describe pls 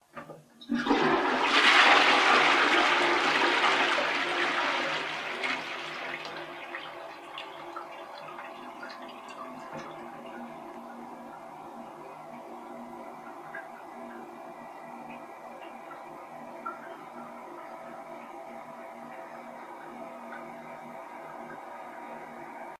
toilet flush record20151219013345
toilet flush.Recorded with Jiayu G4 for my film school projects. Location - Russia.